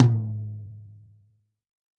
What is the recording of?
Toms and kicks recorded in stereo from a variety of kits.